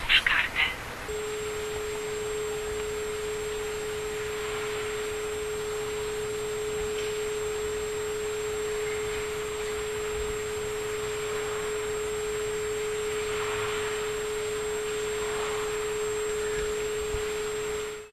telephone booth220810
22.08.2010: about 21.40. on Dolina street in Poznan. the sound from the phone booth.
field-recording, poznan, poland, phone, phone-booth, street